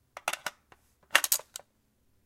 ak47 clip in
Inserting full 30 round bannana clip into AK sporter rifle recorded with B1 mic through MIC200 preamp. Mastered in cool edit 96.